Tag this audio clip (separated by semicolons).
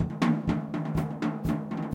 drum; loop